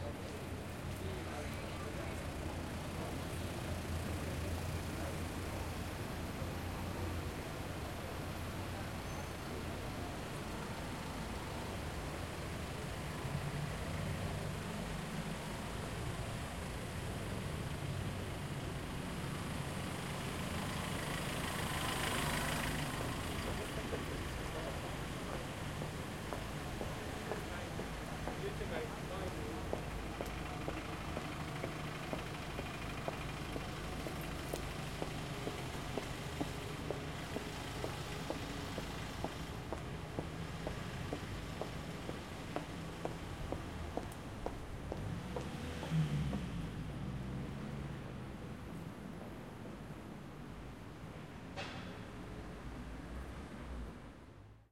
Side Street City Traffic Footsteps London
London, City, Street, Footsteps, Side, Traffic